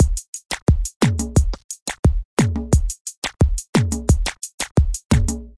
Wheaky 2 - 88BPM
A wheaky drum loop perfect for modern zouk music. Made with FL Studio (88 BPM).
beat, loop, zouk